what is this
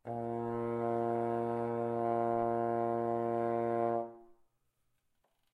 horn tone Bb2
A sustained Bb2 played at a medium volume on the horn. May be useful to build background chords. Recorded with a Zoom h4n placed about a metre behind the bell.
bb, b-flat2, tone, b-flat, french-horn, horn